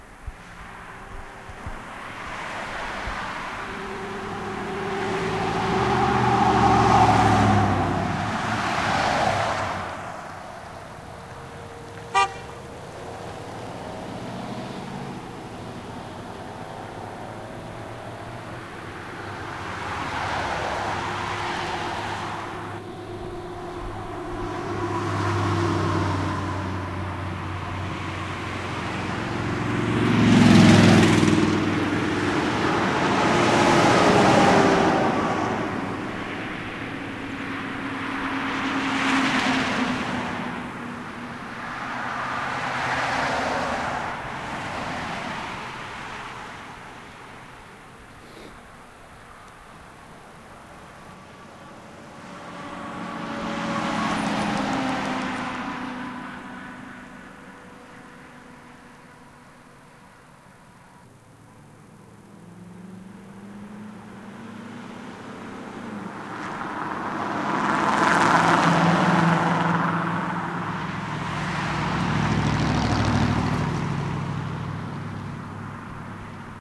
Highway Traffic
Taken at the highway near where I live, just a series of sounds of traffic on the highway.